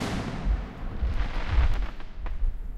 Fireworks in a small valley, over a lake. Boom, and crackle.
Crackle, Firework, Valley